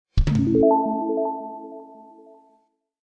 Production Sounder: Button Zipper Usage
I made this on the ThumbJam app for iPad for a movie title graphic that uses iMovie's "Ripple" title transition. It's good for any kind of mood- or time-change or perhaps a quick transition to or from a dream sequence.